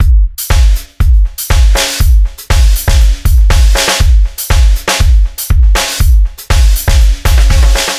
Silene Drums 120 06
Electronic rock rhythm soft distorted and compressed